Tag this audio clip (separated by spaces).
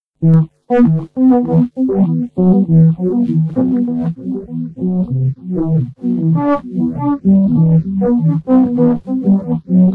delay guitar guitarloop iceland larusg